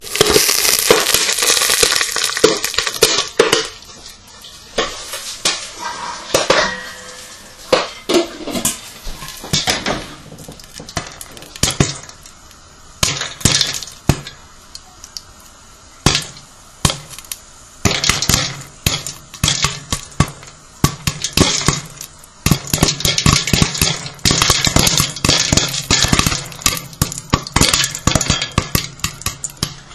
Sizzle Pop Corn
The sizzle of corn popping and the lid being removed from the pan.
cook, corn, fry, pop, pop-corn, popcorn, sizzle